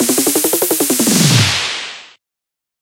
Fill 2 170BPM
Snare drum fill mainly for use in Hardcore dance music but can be slowed down or sped up for other genres of electronic dance music
170bpm, drum, fill, snare